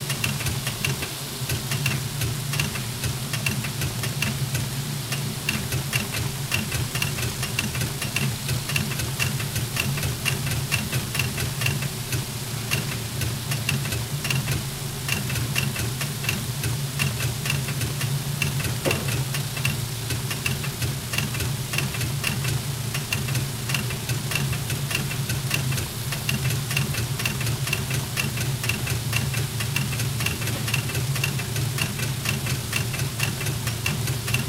Recorded with Zoom N2 in a Post Service sorting facility. Letter sorting machine.
Machine, Industrial, Ambience, Room, Indoors